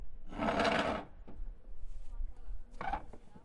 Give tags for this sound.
square; campus-upf; UPFCS12

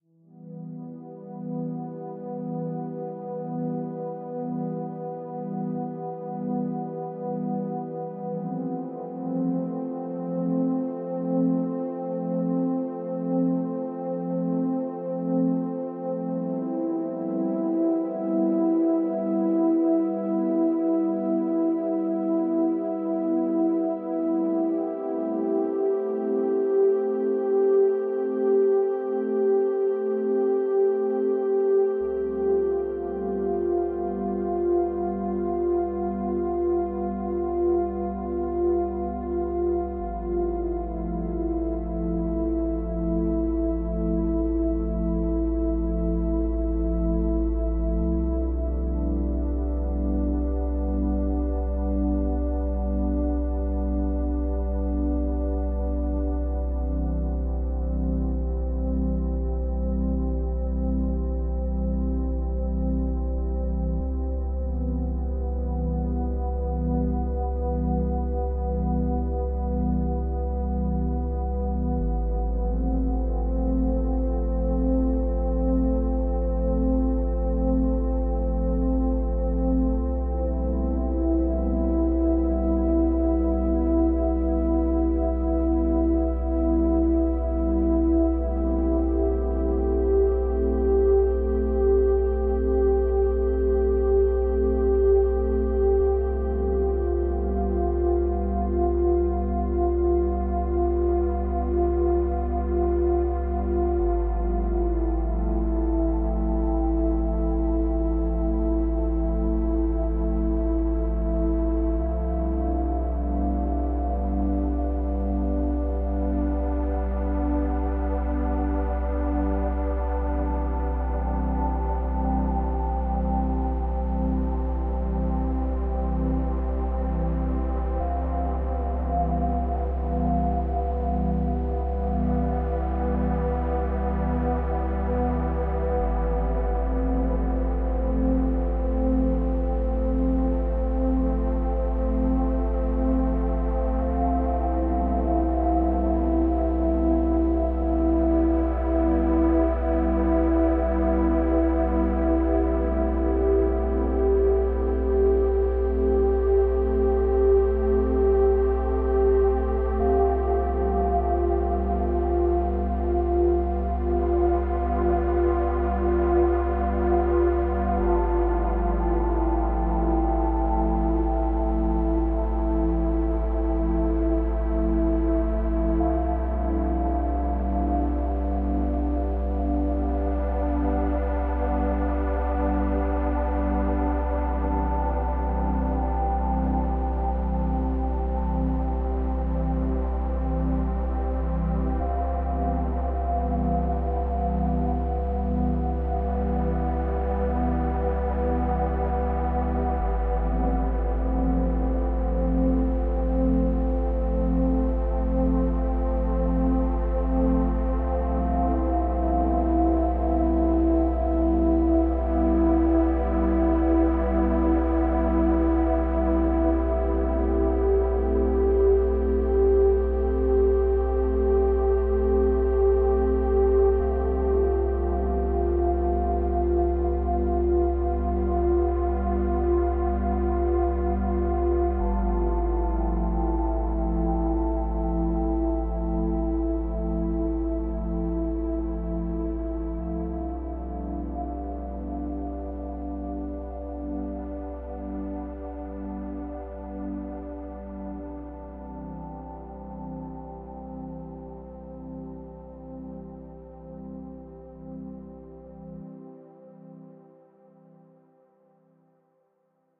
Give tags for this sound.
space; electronic; emotional; chill; relax; drone; piano; deep; experimental; ambience; soundscape; pad; atmospheric; music; calm; ambient; atmosphere; meditation

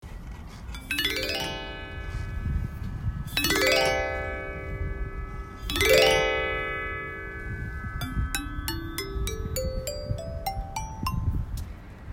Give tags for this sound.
chime; music

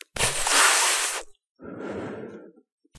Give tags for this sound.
cigarette; puff; smoke; smoking

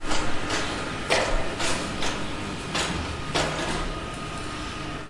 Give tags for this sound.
area; builders; construction; crane; field; fields; machine; noise; noises; object; objects; work; workers; work-field

